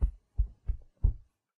Soft footsteps (I think from patting a blanket) - a sound effect for an online game I and my nine-year-old brother made:
feet, foot, footstep, footsteps, running, step, steps, walk, walking